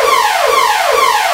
Alarm Loop 4 V2
A falling sawtooth frequency with some reverb that sounds like an alarm of some kind.
synthesized, computer-generated, audacity